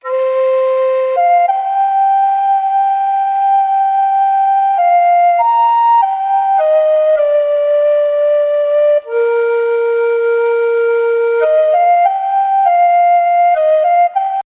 A gentle loop of a Native American-esque flute playing a melody. Original chords used were Cm 7/9, A#6.
In 3/4, 100 beats per minute.